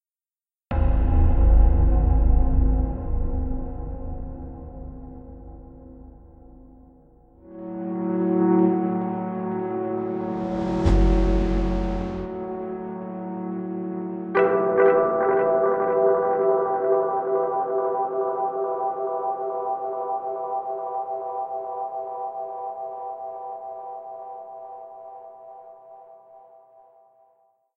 Suspense Episode
Short cinematic soundtrack for your movie.
Suspense, mystery and incomprehensibility.
Sci-fi and post - apocalypse worlds creators - welcome!
tension, cinematic, movie, Suspense, SFX, intro, incomprehensibility, horror, dark, sci-fi, athmosphere, mystery, thriller, soundtrack, Suspenseful